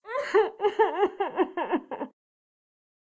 Mujer Llorando s
Crying, sad, woman